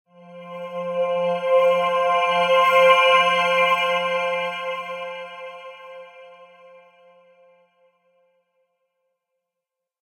Took a digital sweep, highered the mix and made a big hall. Here's the result. Hope u'll like it.